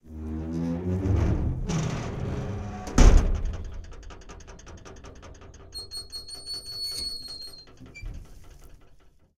metal gate 01
Large metal gate squeaks rattles and bangs.
squeaks, large, rattles, metal, bangs, gate